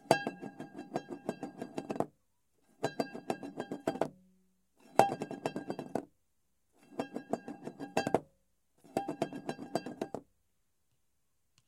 ARiggs Bowl Rolling and Wobbling
A bowl being dropped and wobbling.